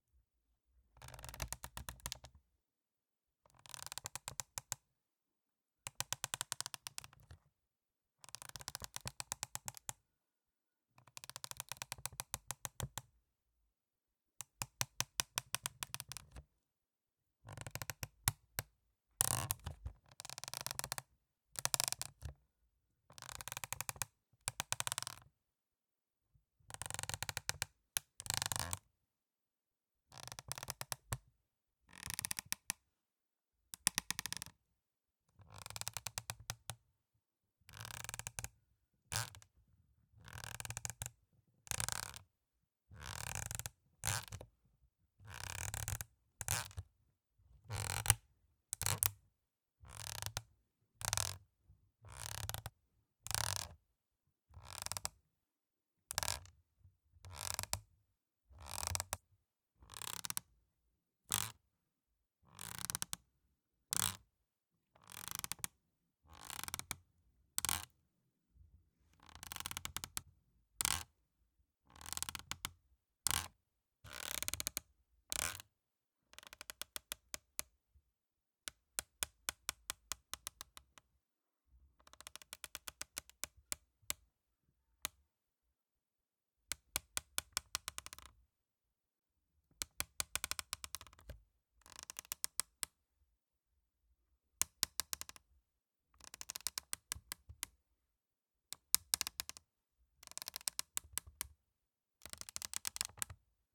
Plastic Creak 01
Slowly flexing a plastic blister package to make it creak. Close mic'd with a hypercardioid so there is a pronounced proximity effect. Applied 50Hz high pass filter, no limiting or normalizing.
CAD E100S > Grace M101 > Mytek Stereo192 ADC > MUTEC MC-1.2 > RX4.